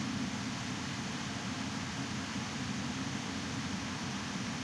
Machine Humming
Machine running and humming in a room.